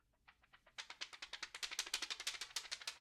paper flux
Flapping a post it note
fluttering, flap, flapping, paper